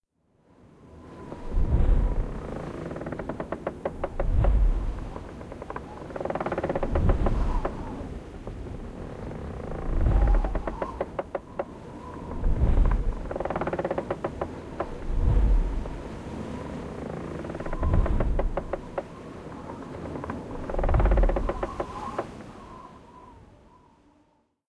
because there are no windmillsound to find, I created one from different sounds.
country, windmill, mixed, mill